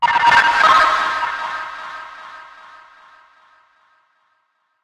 segmented tones similar to Morse code